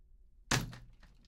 Sonido de una maleta al chocar contra el piso
Sound of a suitcase against the floor
suitcase, crash, maleta, choque, r, gido, collision, impacto, rigid